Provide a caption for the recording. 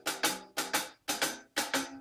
4x4 takt slow closed hihat
This hihatloop was recorded by myself with my mobilephone in New York.
Hihat, LiveDrums, Loop, MobileRecord, Sample